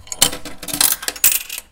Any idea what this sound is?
A quarter is inserted into the coin slot then lands in the coin return.